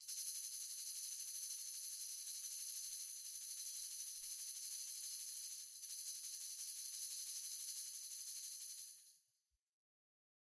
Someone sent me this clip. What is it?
One-shot from Versilian Studios Chamber Orchestra 2: Community Edition sampling project.
Instrument: Percussion
Note: F#3
Midi note: 55
Midi velocity (center): 2141
Room type: Large Auditorium
Microphone: 2x Rode NT1-A spaced pair, AT Pro 37's overhead, Royer R-101 close
Performer: Sam Hebert
fsharp3, midi-note-55, midi-velocity-62, multisample, percussion, single-note, vsco-2